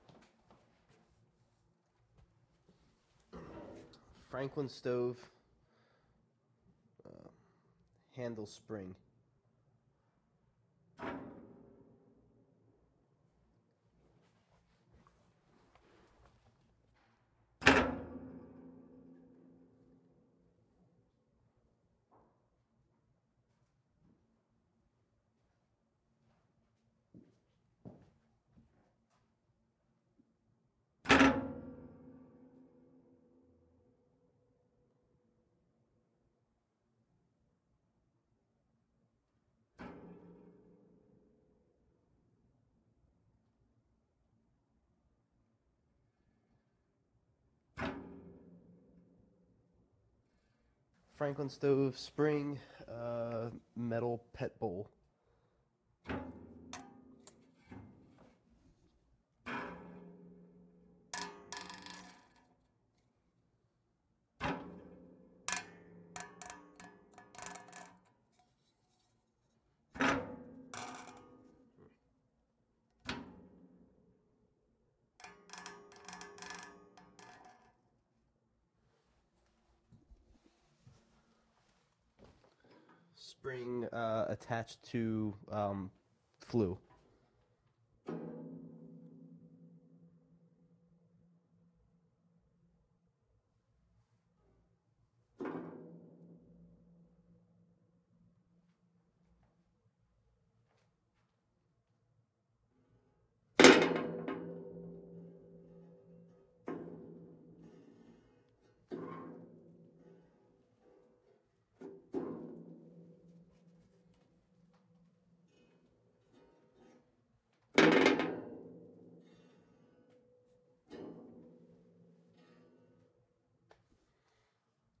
FranklinStoveSpring RX
Various sounds from VERY old franklin wood burning stove
creak, door, groans, handle, hinge, iron, metal, metallic, spring